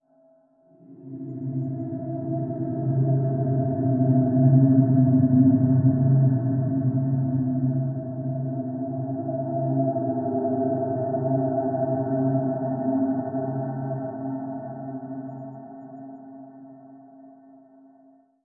LAYERS 004 - 2 Phase Space Explorer is an extensive multisample package containing 73 samples covering C0 till C6. The key name is included in the sample name. The sound of 2 Phase Space Explorer is all in the name: an intergalactic space soundscape. It was created using Kontakt 3 within Cubase and a lot of convolution.